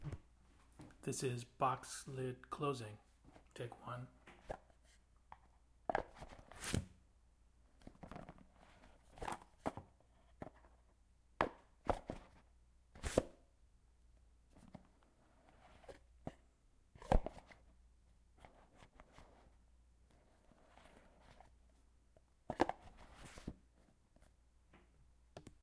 FOLEY Small box lid closing 1
What It Is:
Me handling an iPhone box and closing its lid.
A young girl handling a birthday gift box.
box, foley, cardboard, birthday, gift, present, AudioDramaHub